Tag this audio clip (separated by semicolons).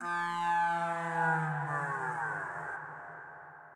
growl; monster